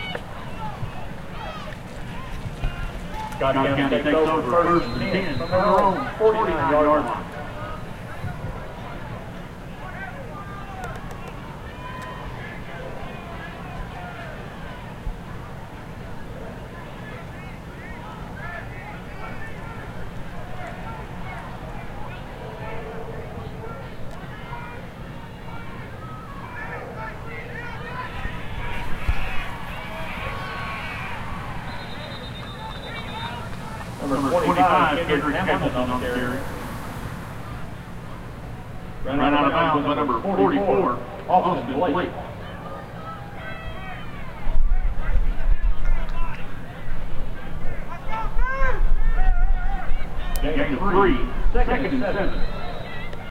161125-001americanfootball-st
American football in Lexington, Kentucky.
crowd, football, high, school